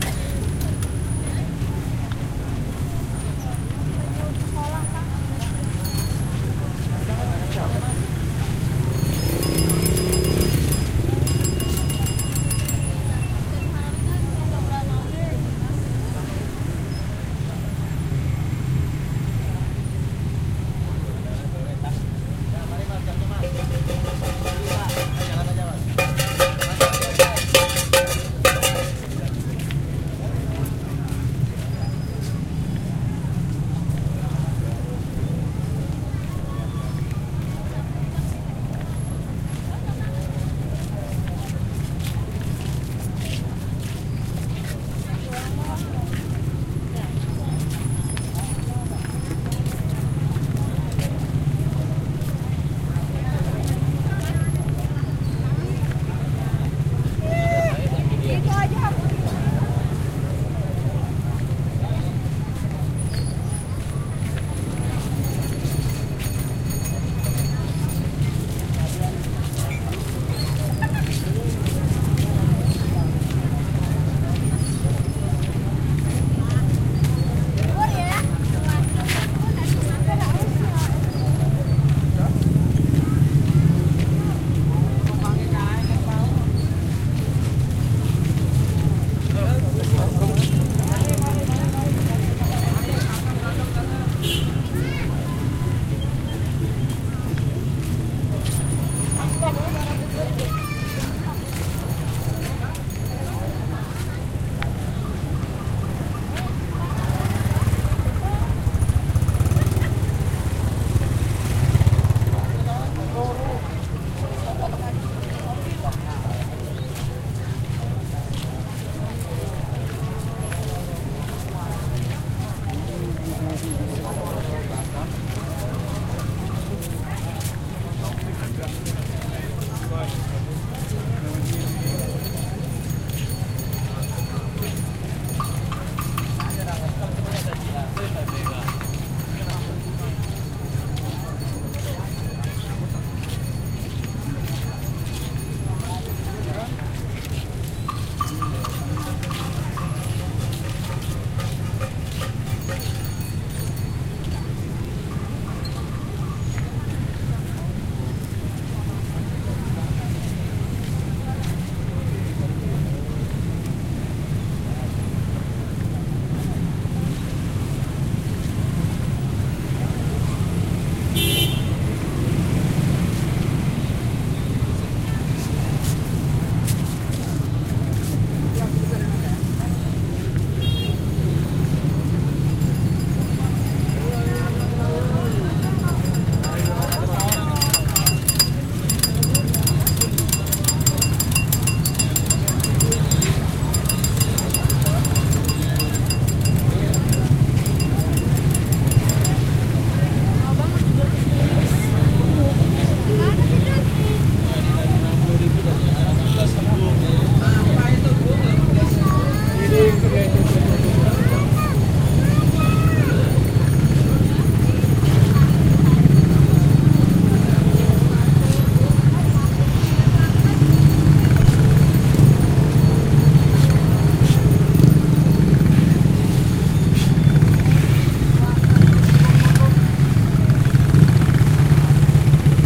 Malioboro at night. Becaks, bikes and pedestrian passing. Recorded with a Zoom H4N.